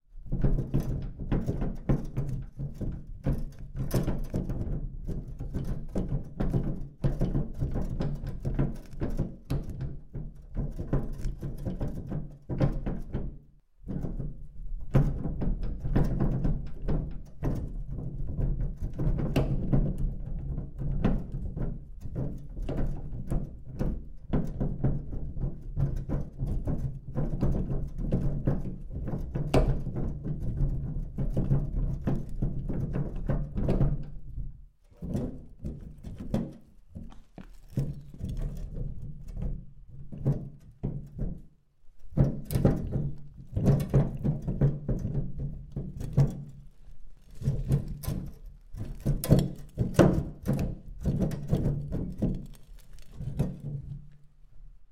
wheelbarrow push on bumpy ground no dirt just clean rattle- steady and more random
recorded with Sony PCM-D50, Tascam DAP1 DAT with AT835 stereo mic, or Zoom H2
bumpy, random, clean, push, wheelbarrow, just, dirt, rattle, steady, more, no, ground